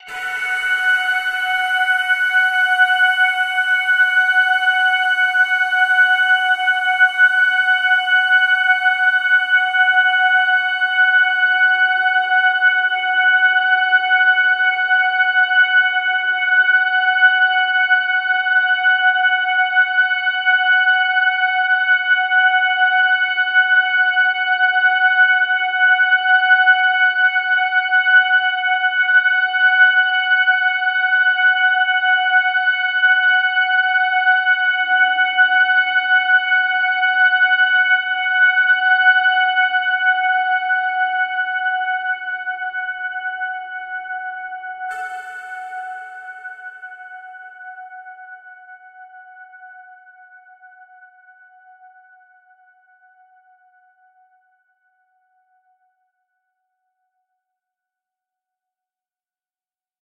LAYERS 013 - FRYDAY is an extensive multisample package containing 128 samples. The numbers are equivalent to chromatic key assignment covering a complete MIDI keyboard (128 keys). The sound of FRYDAY is one of a beautiful PAD. Each sample is one minute long and has a noisy attack sound that fades away quite quickly. After that remains a long sustain phase. It was created using NI Kontakt 4 and the lovely Discovery Pro synth (a virtual Nordlead) within Cubase 5 and a lot of convolution (Voxengo's Pristine Space is my favourite) as well as some reverb from u-he: Uhbik-A.